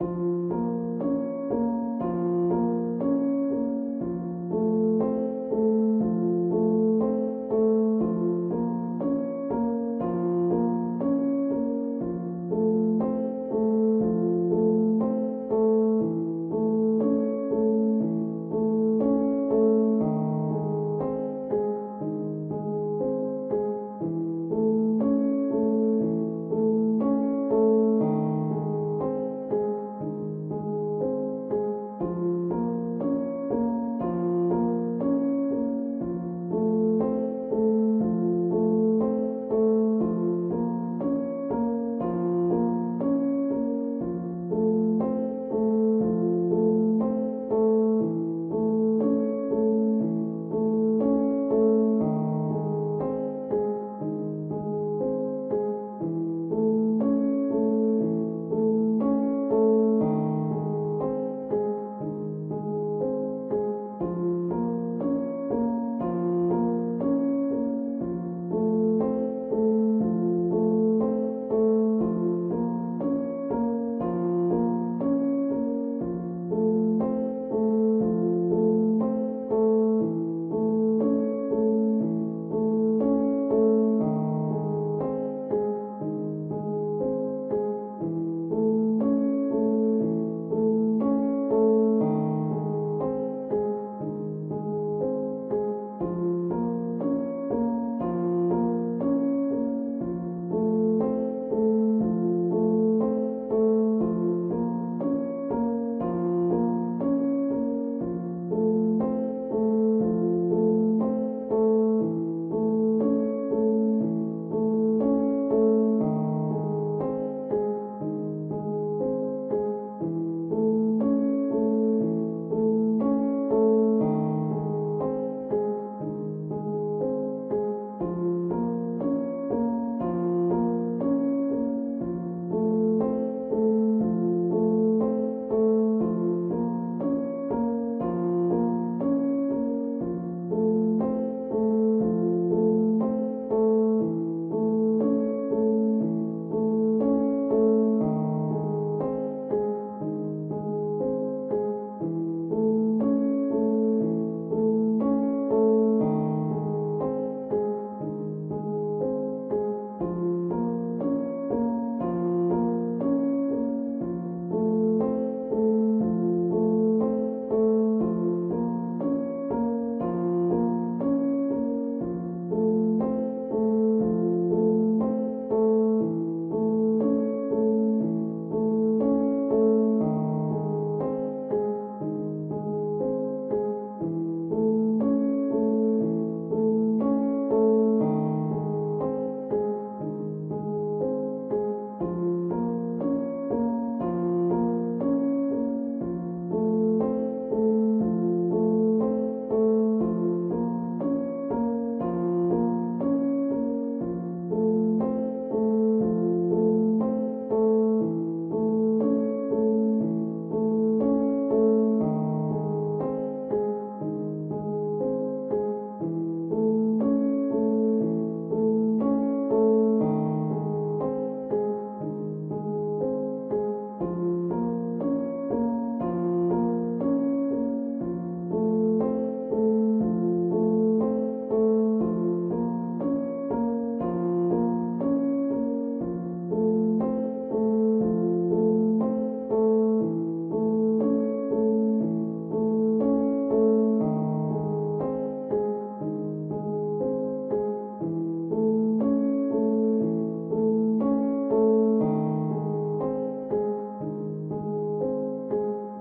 Dark loops 205 octave piano without melody long loop 60 bpm
This sound can be combined with other sounds in the pack. Otherwise, it is well usable up to 60 bpm.
loops, bass, 60, 60bpm, loop, dark, bpm, piano